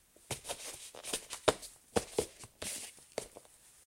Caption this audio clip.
Putting Slipper On
This is the sound made when one puts on slippers of a wooden floor
On, OWI, putting, slipper